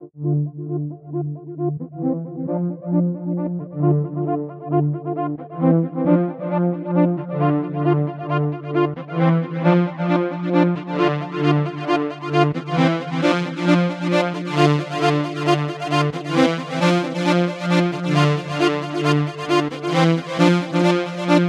a rythmic pad with a filter opening.
pad, synth